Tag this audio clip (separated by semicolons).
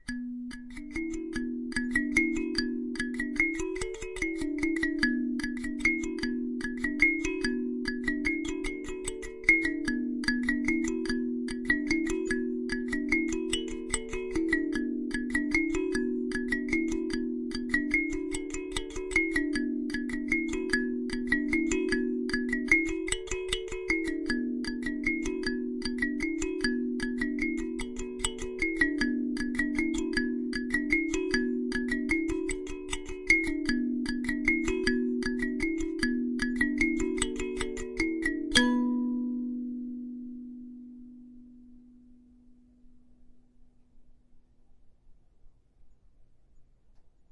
African; ostinato; rhythmic